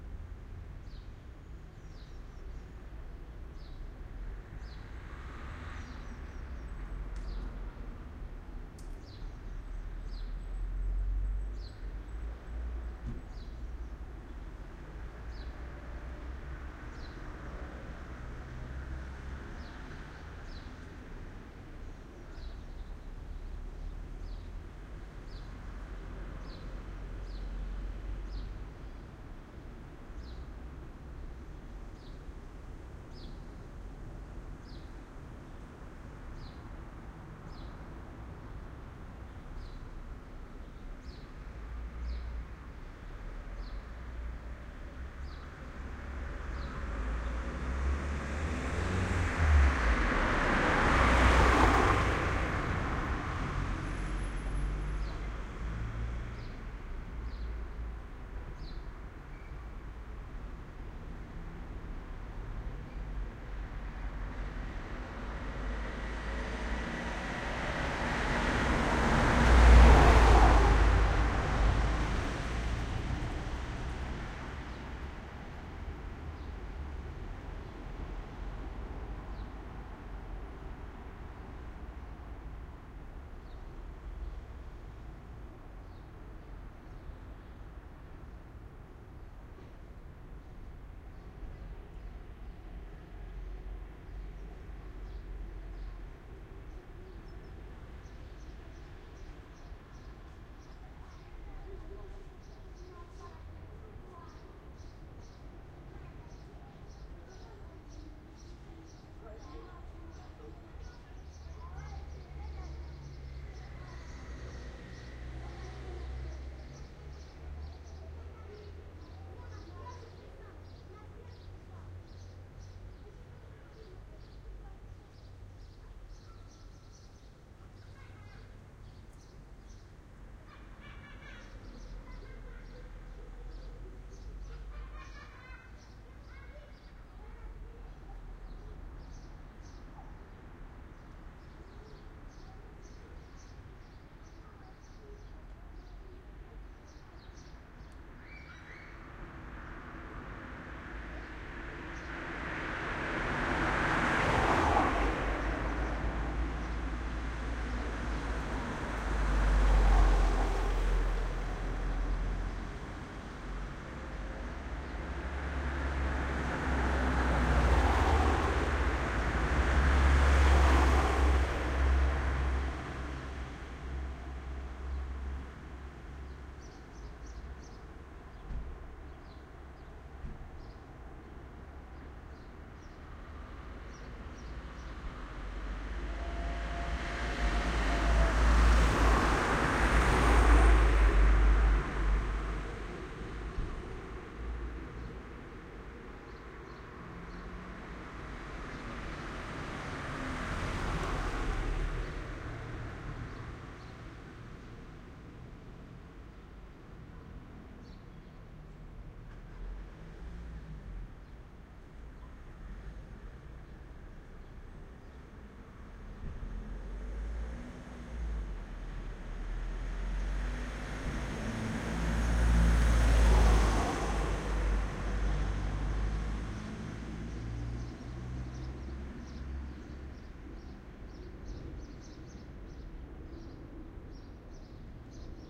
Street Calm Very light traffic birds pedestrians
City, Calm, Traffic, Ambiance, Street